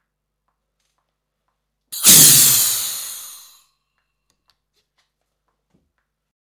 Laughing gas/nitrous oxide/nos balloon inflation audio sample #04
Inflation of nos balloon recorded on wide diaphragm condenser, with acoustic dampening around the mic but not in studio conditions - should be pretty cool for a non synthy noise sweep, or for a snare layer